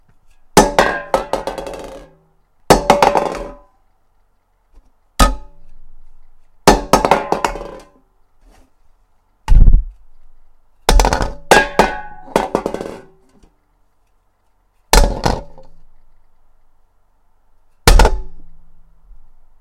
Can drop clang
this is actually the sound of me dropping a can on a desk a few times
can, clang, metal